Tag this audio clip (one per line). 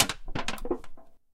kitchen
aluminum
metal
bang
clang
pot
pan
crunch